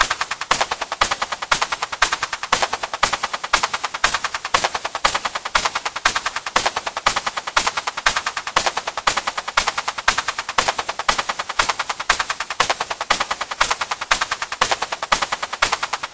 Drum Loop Solo Clap 2 - 119 Bpm
bpm, 2, drum, solo, 119, loop, clap